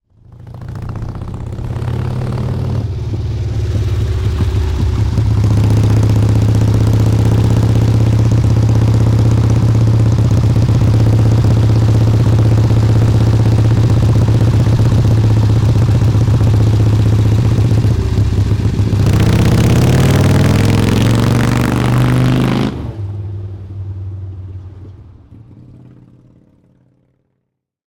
Harley Davidson XLCH 1974 8
Harley Davidson XLCH 1974, 1000 cc, during riding recorded with Røde NTG3 and Zoom H4n. Recording: August 2019, Belgium, Europe.
1974, Belgium, Harley-Davidson, Motorbike, Motorcycle, XLCH